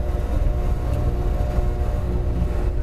short recording of a dryer at a laundromat, recorded to minidisc